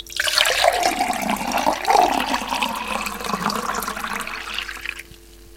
filling water glass